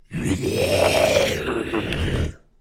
Monster growl 2
Monster sounds yay!
creature, creepy, growl, horror, Monster, scary, snarl, terror, zombie